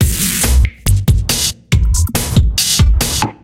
Drums loop 140BMP DakeatKit-05
made by Battery 3 of NI
140bpm, drums, loop